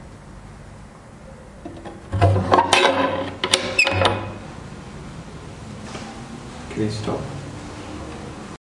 Opening bathroom window OWI
Recorded with rifle mic. Can be used as any window opening
Opening, OWI